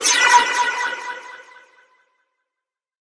Magic Burst3
Made in Audacity using some wood breaking, and partially my voice, added delay, and added partial reverb.
spell, magic, sparkle